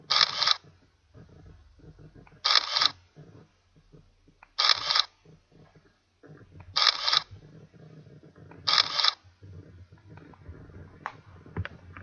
digital camera shutter sound
camera, shutter, snap